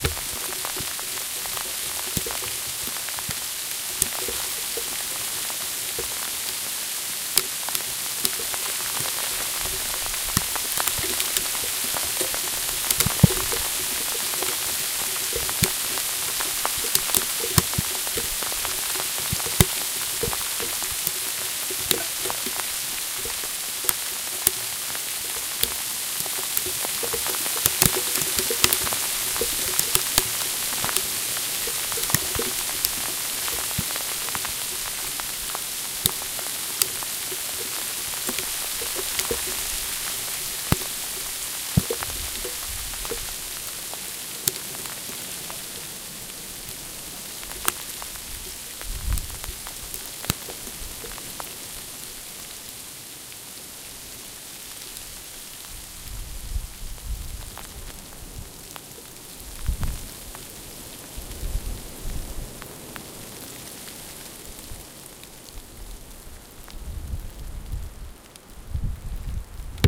Hail on a path in the mountains
hail, path